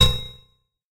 STAB 019 mastered 16 bit
An electronic percussive stab. A little electronic metallic percussion
sound. Created with Metaphysical Function from Native Instruments.
Further edited using Cubase SX and mastered using Wavelab.